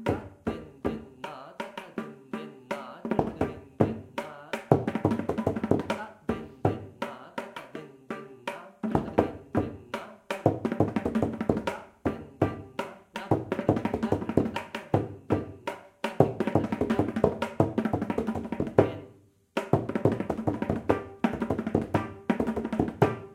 Konnakol with Mridangam
A short example of a short theka in Adi taala (a cycle of 8 beats) on Mridangam and Konnakol (oral percussion). The Mridangam strokes are played along with the corresponding solkattu (onomatopoeic syllables of the Mridangam strokes) said aloud.
Indian-percussion, Adi-taala, Solkattu, Mridangam, bols, Konnakol, CompMusic, Oral-percussion